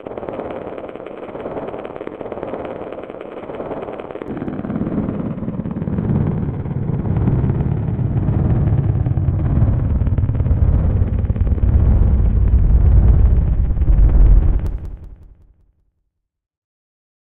Waves of suspense 2
A loopable, rhythmic sound clip, meant to play in the background of a scene.